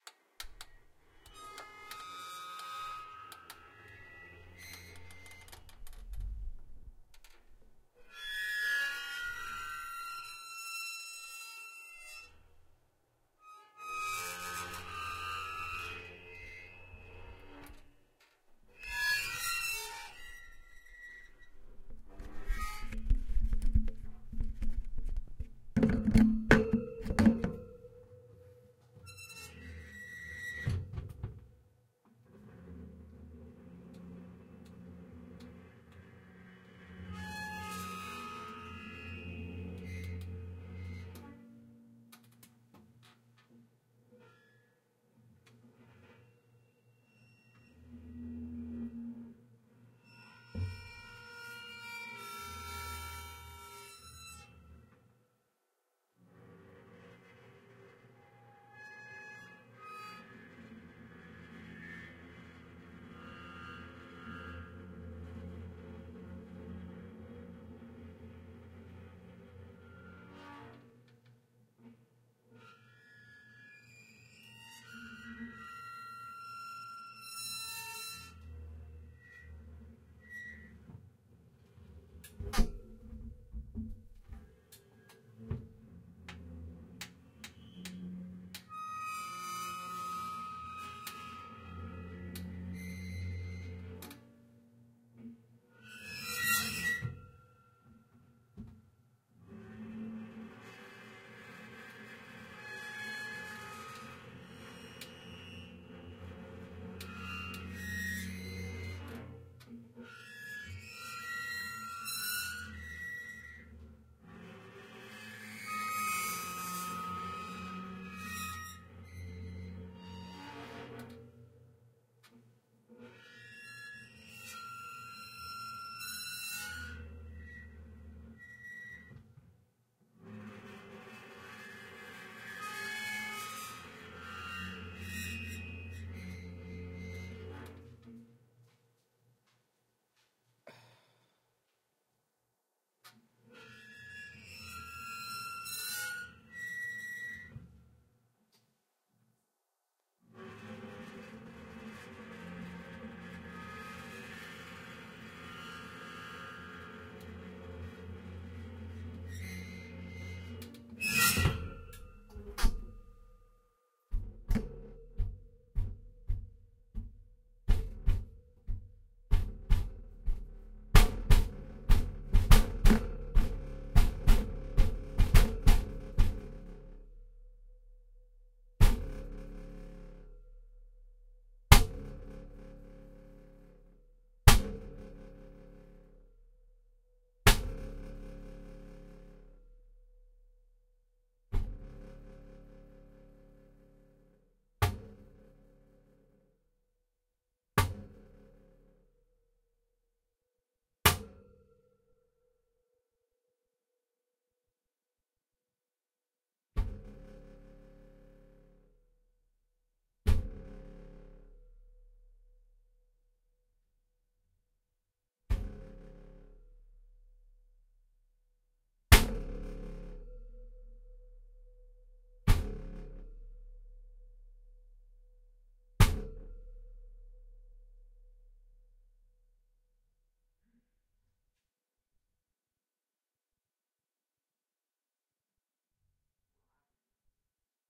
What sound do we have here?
Stuck a ZoomZH1 in an oven, slowly opened and closed it and then closed it and hit it several times. Denoised with iZotope RX.